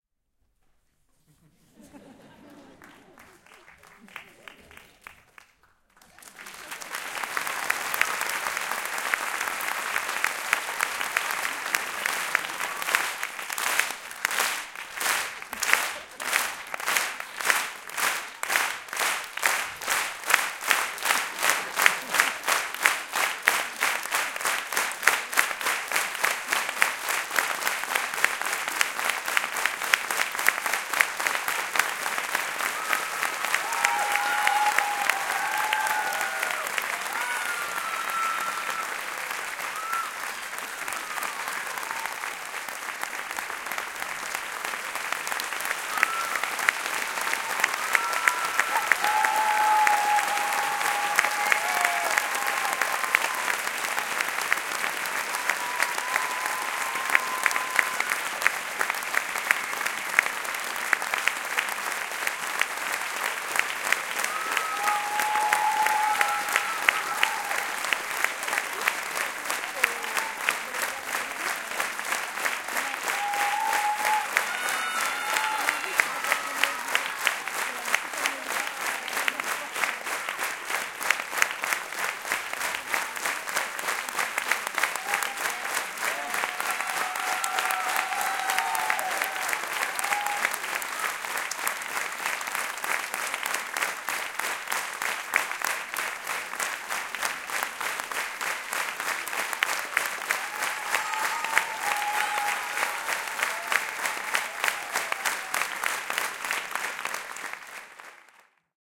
R4 00357 FR LaughAndCheering
Audience laughing and cheering recorded in a medium sized theatre hall located in Rueil-Malmaison (suburb of Paris, France).
Recorded in November 2022 with a Centrance MixerFace R4R and PivoMic PM1 in AB position.
Fade in/out applied in Audacity.
ambience, applaud, applauding, applause, atmosphere, audience, cheer, cheering, clap, claps, crowd, enthusiastic, field-recording, France, hand-clapping, hands, indoor, large, laugh, laughing, medium-sized-room, people, Rueil-Malmaison, shout, show, theatre, voices, wide, yell